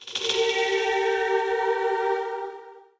female voice choral 14
female voice "tube" sample multiplication
choral, female-voice, transformation